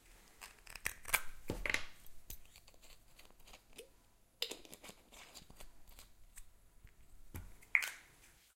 Syrup bottle
Opening and closing a bottle with syrup. Recorded with Zoom's H6 stereo mics in a kitchen. I only amplified the sound.
medicine, syrup, field-recording, bottle, foley